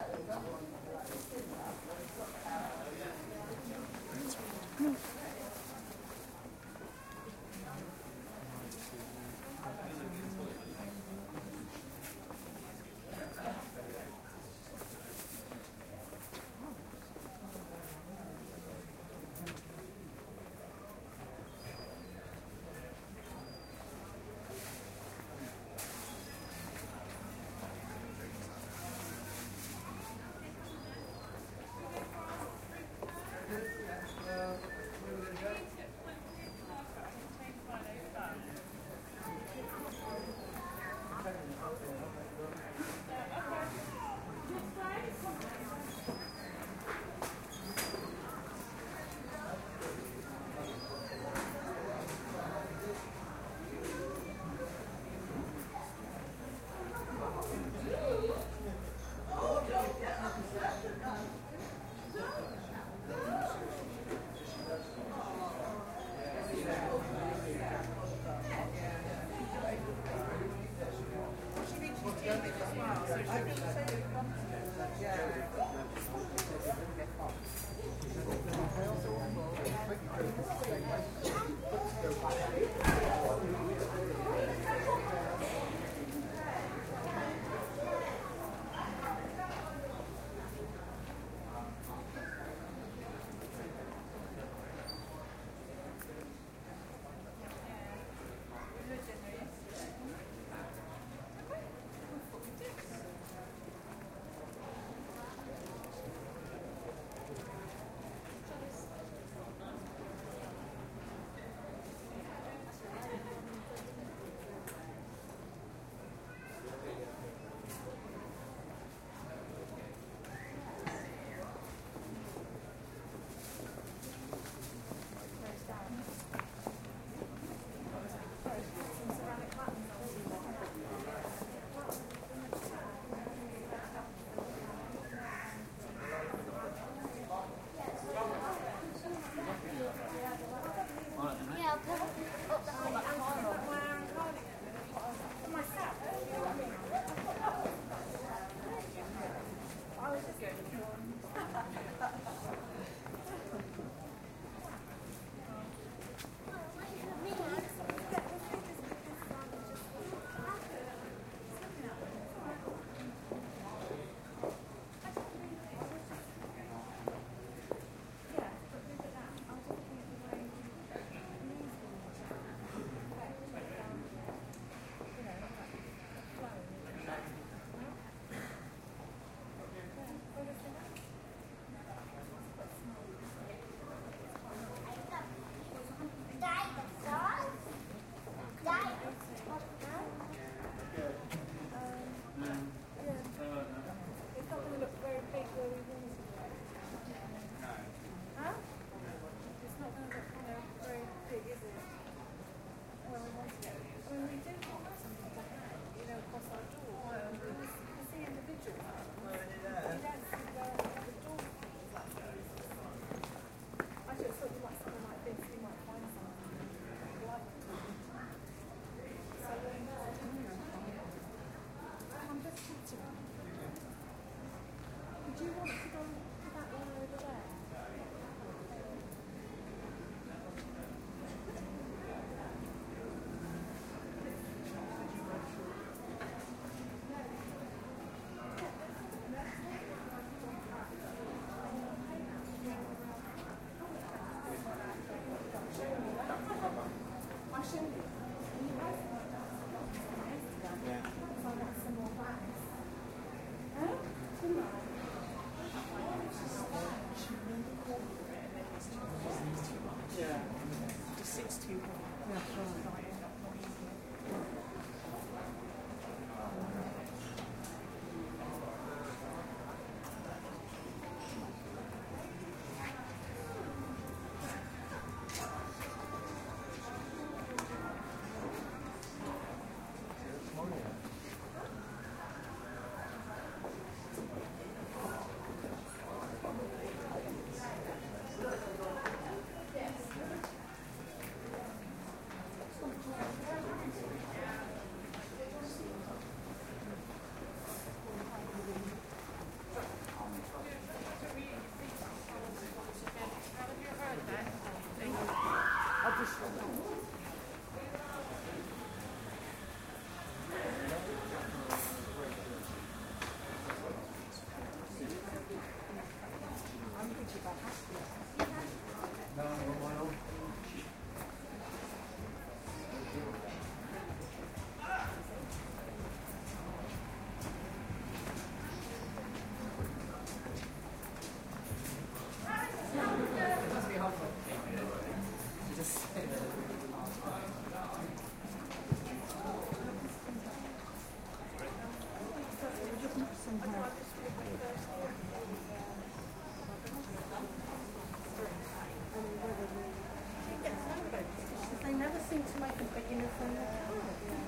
Walk down High St no cars
A walk down High Street in St Ives, Cornwall. Unusual since there are no cars sounds.
no-cars, pedestrians, Street-sounds